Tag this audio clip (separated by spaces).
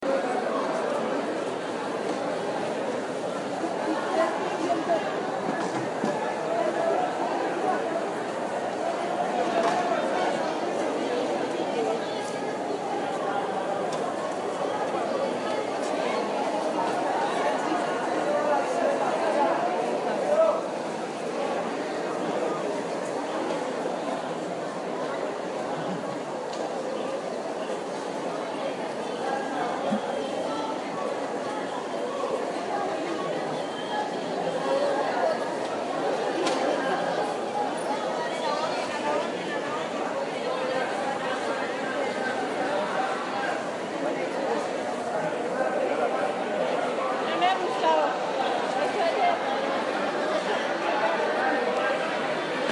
Mercado Zipaquira Plaza